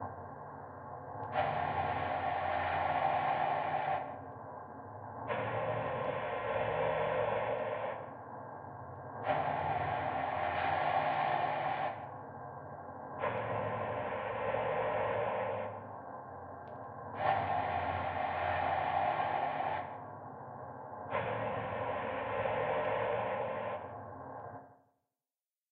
Breathing man machine
The breath of an android, robot, a machine or an alien. created with the plex synthesizer.
alien, android, artificial, bionic, breath, cyborg, galaxy, intelligent, machine, robotic, sci-fi, space, spaceship